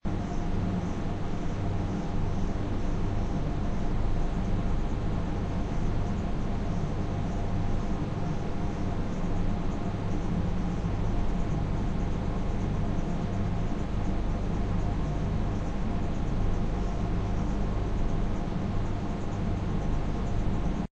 noisy air conditioner
well,we all have a noisy PC !so I decided to record my PC fan
it's sounds exactly like an air conditioner (with a little imagination)
SOUND, FX, recording, OFFICE, home